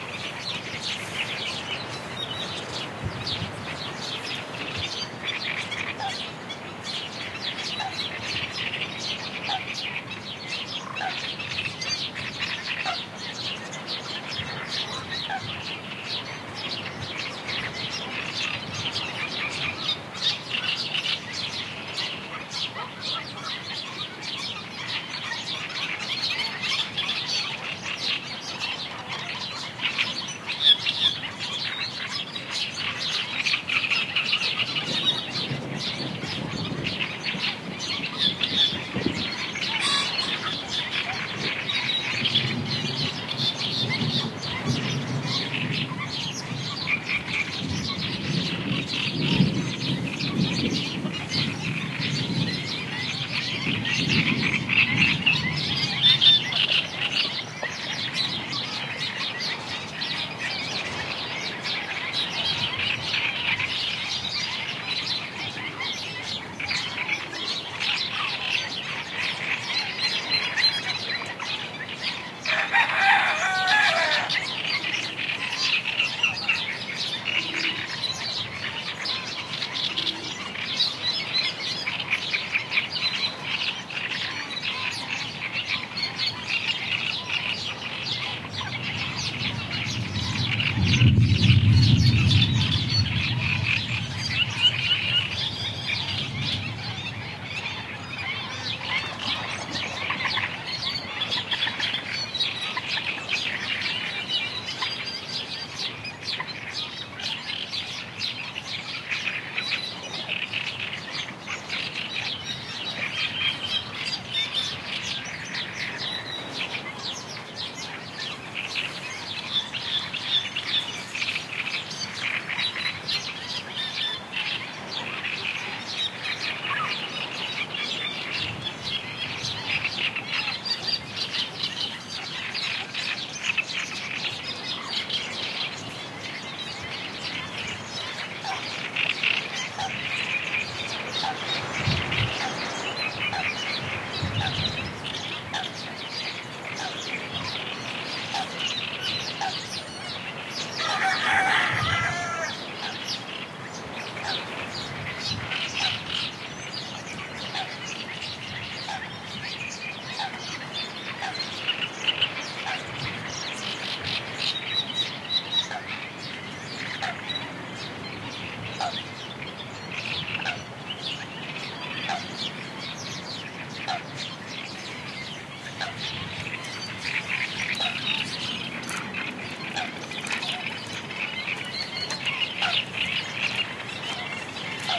20100418.marshes.storm.02
second take of a stormy day in the marshes of Donana, with many bird calls (Black-winged Stilt, House Sparrow, Rooster, Coot, Great Reed Warbler and more), sound of rain drops, wind and distant thunder. Sennheiser MKH 60 + MKH 30 into Shure FP24 preamp, Olympus LS10 recorder. Mixed to mid/side stereo with free Voxengo plugin.
rooster, storm, warbler, marshes, field-recording, donana, ambiance, birds, thunder